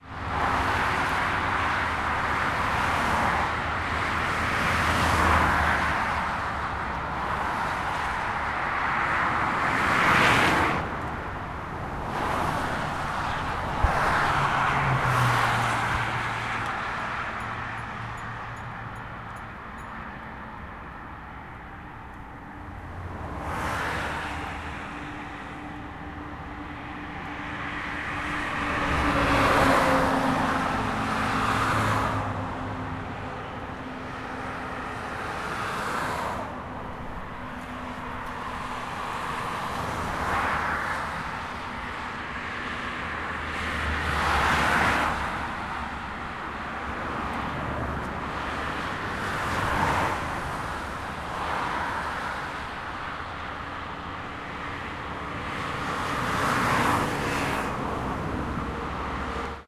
Motorway in Braunschweig, Lower Saxony, Germany on a friday afternoon
car, highway, germany, motorway